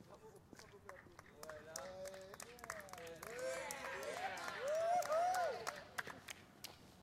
Group of people - Cheering - Outside - 01
A group of people (+/- 7 persons) cheering - exterior recording - Mono.